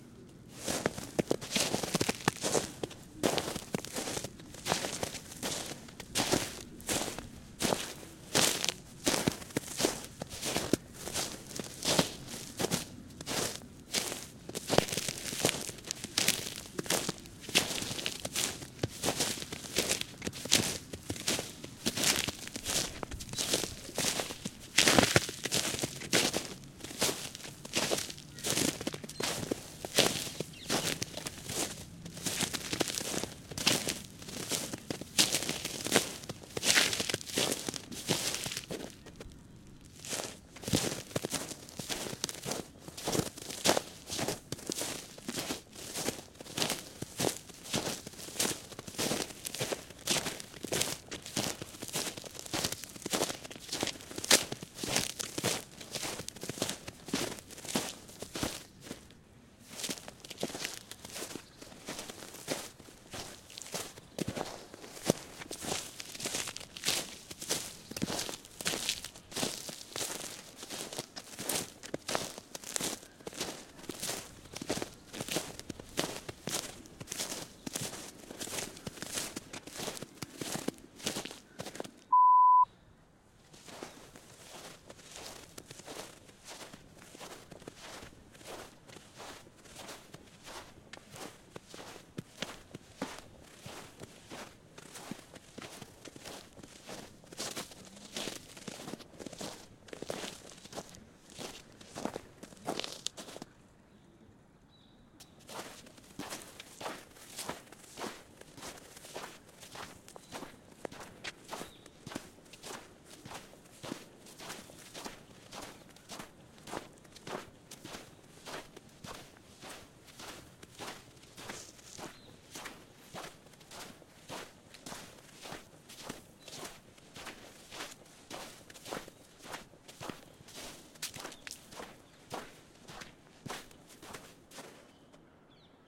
Walk in snow
Walking in crispy snow. Various versions.